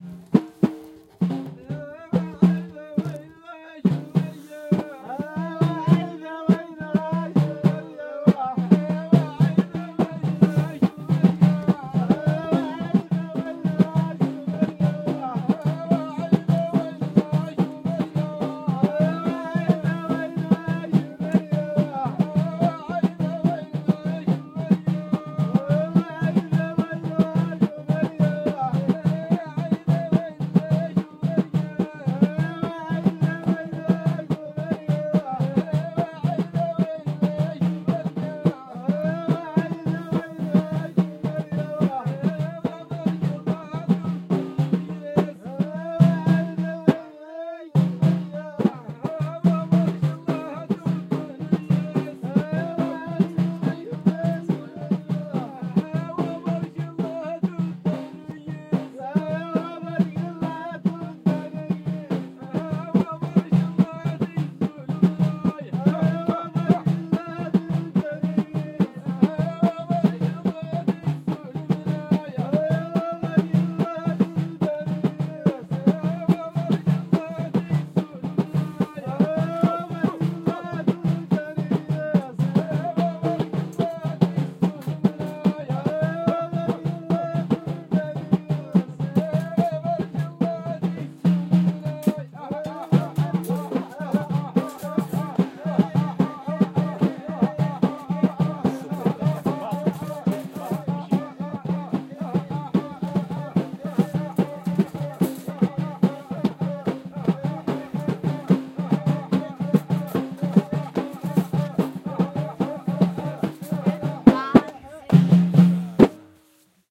Berber Music Dessert South Marokko
A piece from a Berber tribe of South Marokko. It tells a sad story about a bride who has to go in another village and leave her family to marry.
Atmosphere, Country, Marokko, Public, Travel